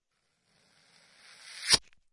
prise de son de regle qui frotte